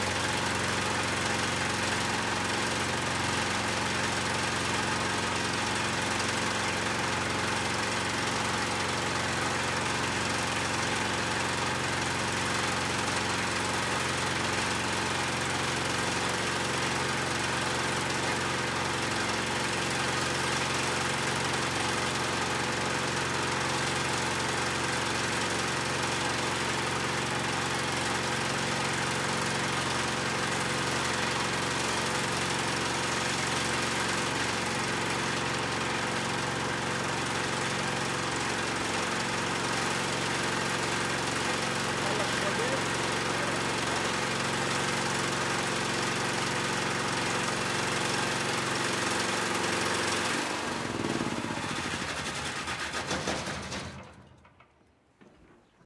water pump motor (or could be generator) sharp heard nearby from top of roof Gaza 2016
water, pump, motor, generator